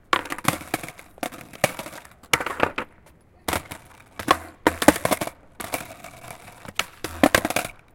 The sound of skate boards that i take for my video project "Scate Girls".
And I never use it. So may be it was made for you guys ))
In this one girl just hitting her Board.